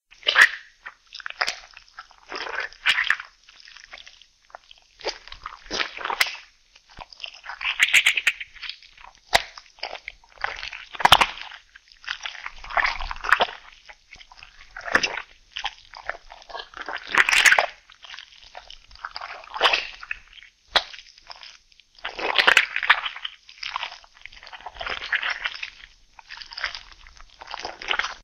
Content warning
monster, zombie, undead, evil, eating, horror